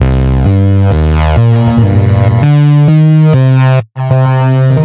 20140316 attackloop 120BPM 4 4 Analog 1 Kit ConstructionKit BassAmpedWeirdDelay3
This loop is an element form the mixdown sample proposals 20140316_attackloop_120BPM_4/4_Analog_1_Kit_ConstructionKit_mixdown1 and 20140316_attackloop_120BPM_4/4_Analog_1_Kit_ConstructionKit_mixdown2. It is the bass loop which was created with the Waldorf Attack VST Drum Synth. The kit used was Analog 1 Kit and the loop was created using Cubase 7.5. Various processing tools were used to create some variations as walle as mastering using iZotope Ozone 5.
rhythmic, ConstructionKit, bass, 120BPM, electronic, loop, electro